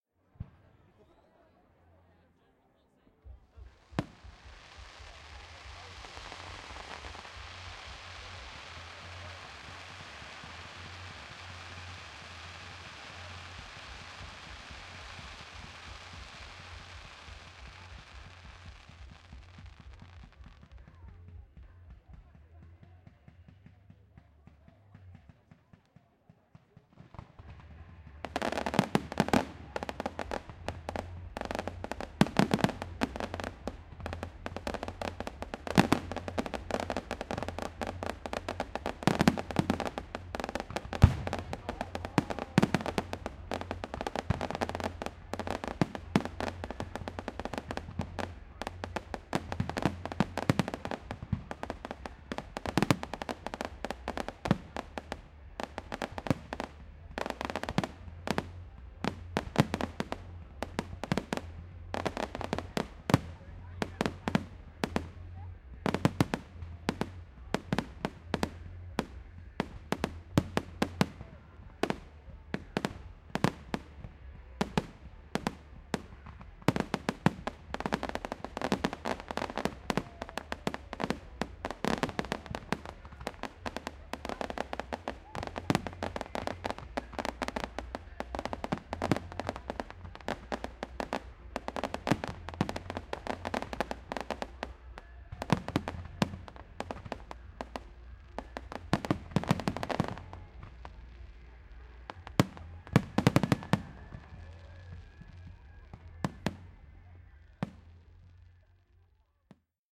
Bonfire Night Fireworks at Abbey Park, Leicester 05.11.11
Recording of the fantastic fireworks display put on by Leicester City Council on bonfire night.
soundscape, filed-recording, soundmap, leicester, fireworks